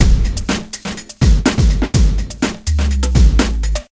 big beat, dance, funk, breaks